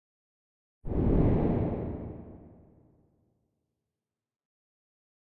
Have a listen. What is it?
roar hit
A roar or door opening in the distance. The sound of a large creature breathing
roar, scary, hit, breath